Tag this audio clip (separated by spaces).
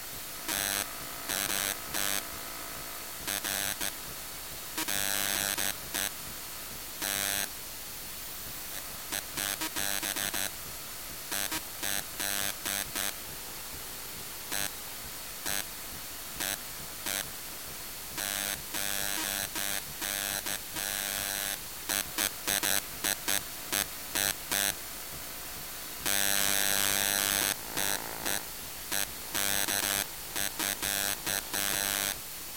EMF recording sound